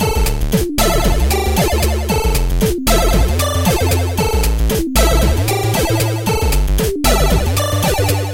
A short SIDlike-loop with tempo-swing and improved frequency-response. This is from Teenage Engineering PO-20 Arcade synth (calculator like) - Crunchy EQ